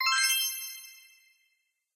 SFX Magic
Simple magic sound. Made in FL Studio.
answer, chimes, magic, reveal, right, spell